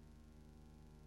lsjlt 26 acceleartor
An accelerating mixture of sounds moving from slow ticks and hums to machnery or motor cycle like sounds - created by adjusting lfo and pitch parameters.
electro, electronic, processed, tick